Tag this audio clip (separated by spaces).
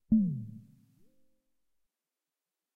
over computer game Fail